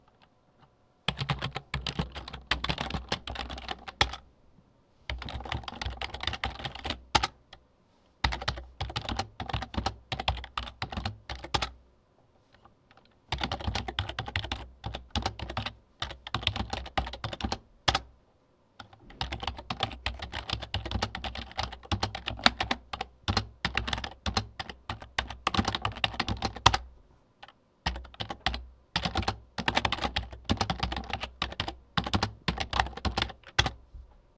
This is the sound of someone typing on the keypad of a computer.
keypad, typing